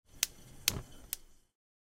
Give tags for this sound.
chef
cook
cooking
flame
frying
ignite
light
lighter
match
spark
stove

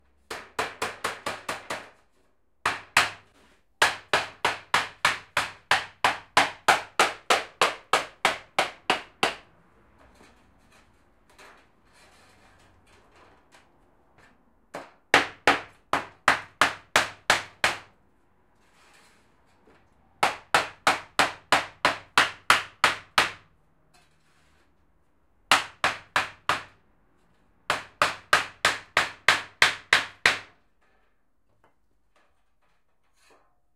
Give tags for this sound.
construction
hammer
noise
builder
metal
metalworking
clatter
rap
knock
tap
elector
repair
rumble
thumb

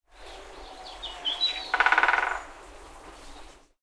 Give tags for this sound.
wood
knock
pecker
distant
woodpecker

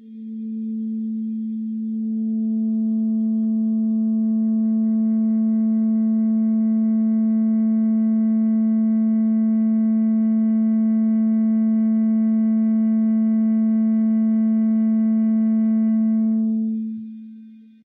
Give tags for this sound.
miscellaneous
guitar
extras
distorted

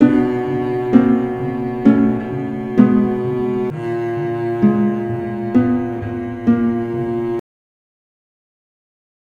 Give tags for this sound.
videogame
ambient
games
sound
video
terror
game

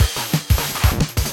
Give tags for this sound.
beat
drum
modified

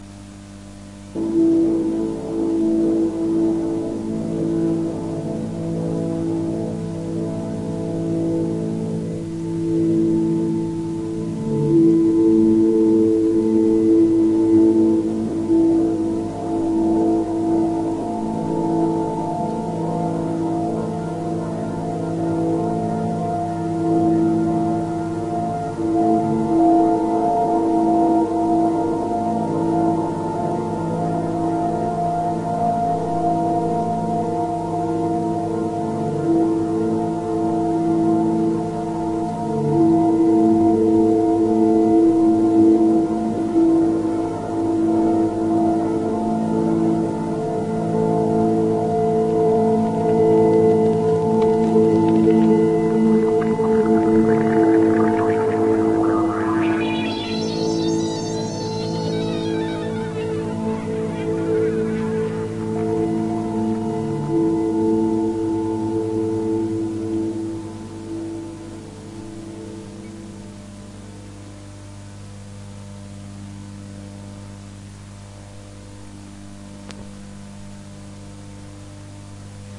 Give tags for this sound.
saturation
collab-2
volume
cassette
tape
Sony

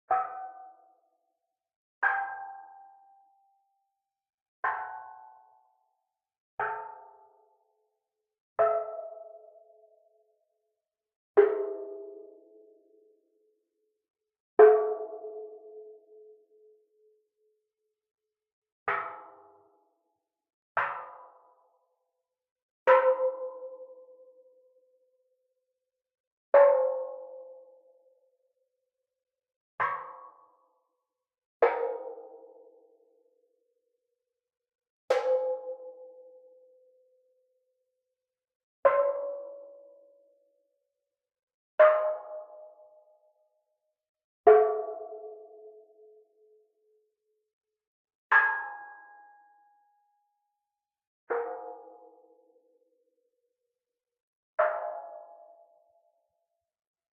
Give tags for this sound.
hit
percussion
timpani
flickr
metal

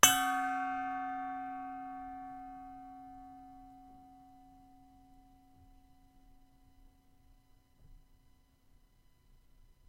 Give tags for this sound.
chime
Ping
Bell
Ting
ambient
Ring
Gong
Ding

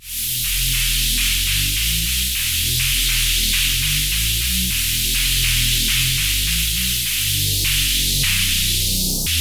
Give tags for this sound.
rave
house
trance
dub-step
electro
dance
bass
club
techno
loop
saw
wave
electronic
synth

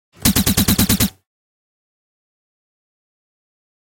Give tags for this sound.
Laser
Heavy
Automatic
Auto
Rifle
Machine
Gun
Weapon

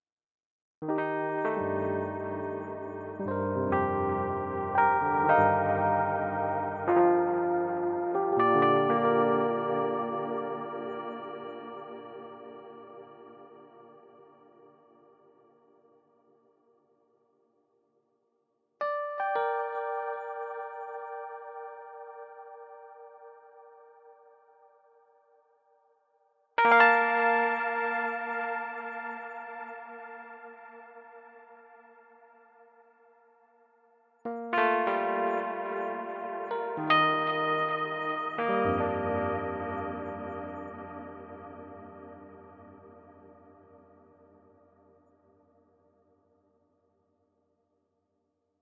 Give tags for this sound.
electric-piano
electronic
music
processed
synth

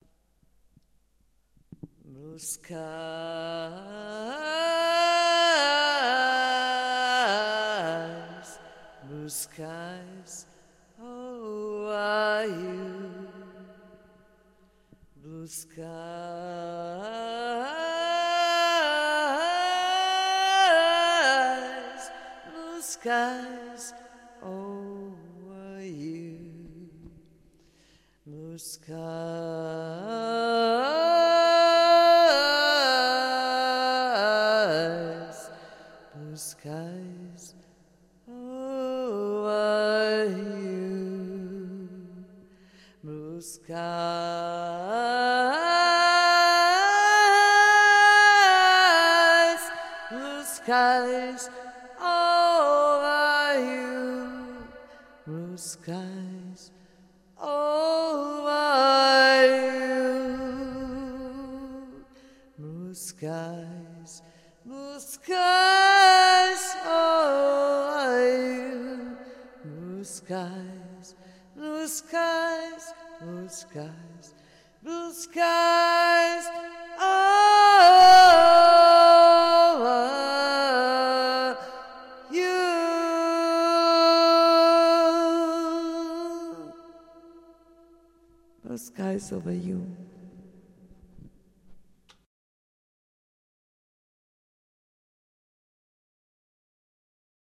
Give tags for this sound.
Blur
female
roses
singing
sky
vocal
voice

